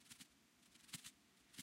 small bird 2
attempt to make the sound of a small bird cleaning itself on a branch, short burst